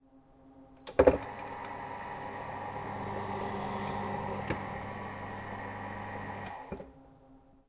That's the sound of the ham slicer machine in a butcher shop. Recorded with a Zoom H2.